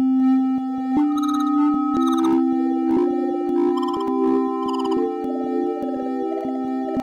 The third song, couple the two first song in a more involved way. After a bass boost of the second song, a tempo change, and and a very pronounced echo, this song is rhythmic but not like a music.
This song is a kind of weird Alien soundtrack.
try, soundtrack, alien, echo, boost, rhytmic